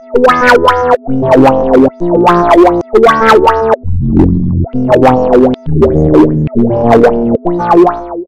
02130funky intro
This is the 3rd little motif or tune made from the
free bubble recording. By "warping" the sounds, so
to speak, using equalization changes, pitch changes,
Gverb, and harmonic generation, I created notes and
made a kind of motif.
guitar, music